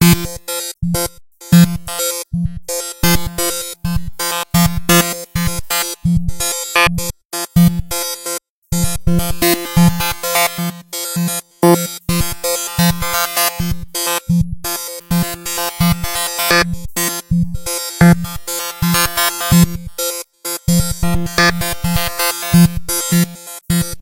This is a drumloop at 80 BPM which was created using Cubase SX and the Waldorf Attack VST drumsynth.
I used the acoustic kit preset and modified some of the sounds.
Afterwards I added some compression on some sounds and mangled the
whole loop using the spectumworx plugin. This gave this loop an experimental robotlike vocoded sound.